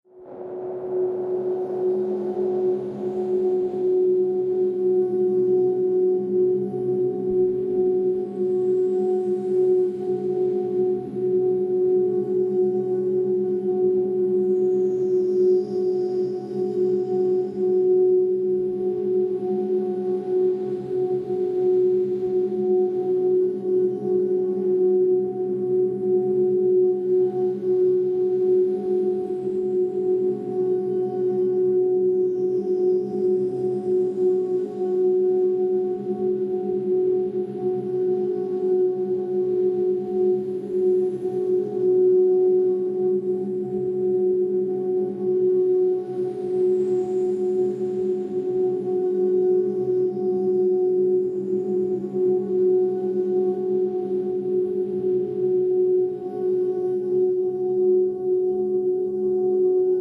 An ambient drone sample that I created with granular synthesis. I think the original sample was a piano loop?